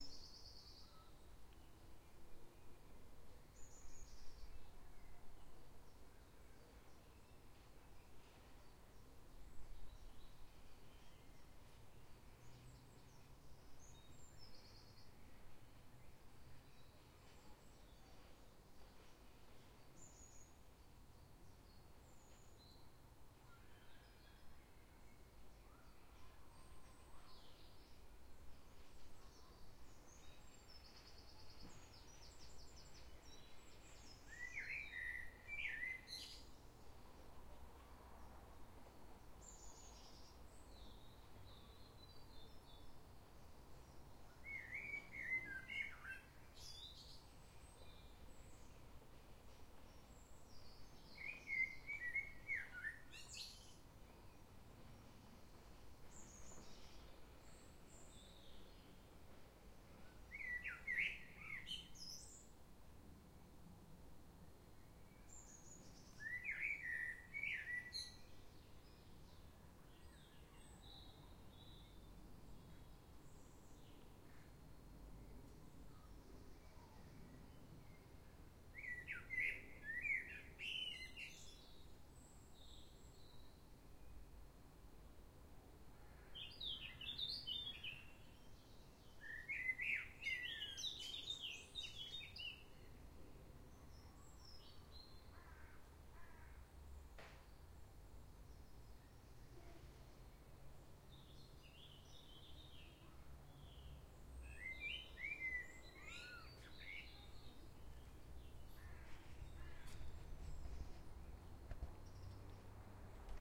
Blackbird Crystal Palace
Ambient recording near Crystal Palace, London, with prominent blackbird song made on May 7th 2016 using a Tascam DR 40.
birdsong, Blackbird, Field-recording, London, Urban, Wildlife